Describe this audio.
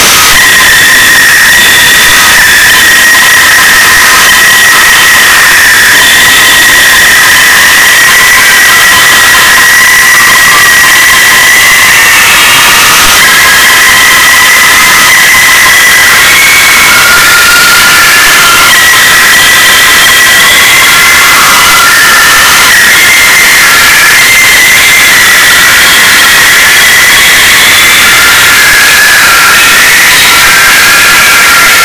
Started as some dogs barking outside, sped up, reversed, reverbed, and distorted to hell and back. Please, make it stop.
annoying, harsh, loud, noise